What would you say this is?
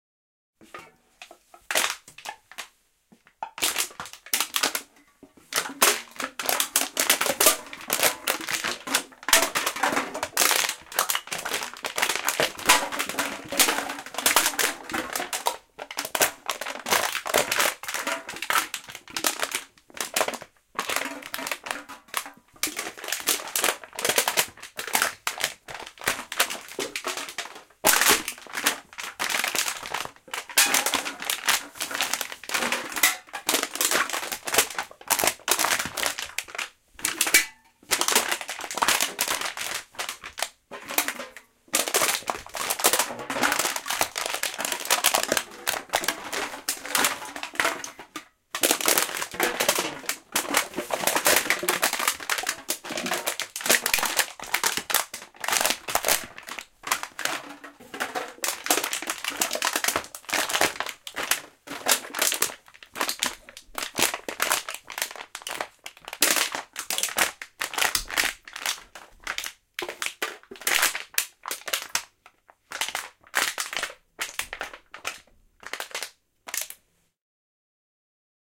Aluminium Cans Crushed
A stereo recording of aluminium beer cans being crushed by two pairs of feet prior to recycling. Rode NT4 > FEL battery pre-amp > Zoom H2 line in.